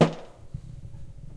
ATIK 2 - 70 stereoatik
RATTLE HIT AND ROLL These sounds were produced by banging on everything I could find that would make a sound when hit by an aluminium pipe in an old loft apartment of mine. A DAT walkman was set up in one end of the loft with a stereo mic facing the room to capture the sounds, therefore some sounds have more room sound than others. Sounds were then sampled into a k2000.
percussion, household, acoustic